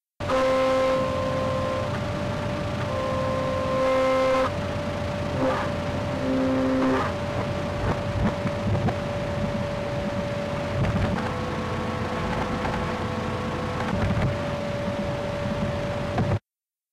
pre-recorded organ sounds run through a SABA television at high volume; recorded with peak and processed in Ableton Live